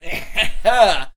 Human Good 24
A clean human voice sound effect useful for all kind of characters in all kind of games.